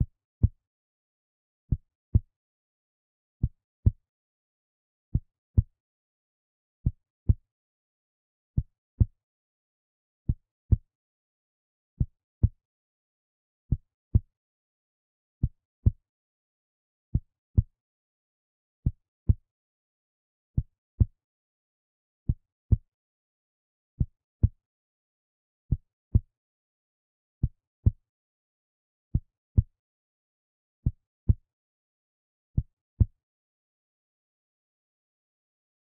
Human heartbeat made in LMMS
heartbeat, heart-beat, heart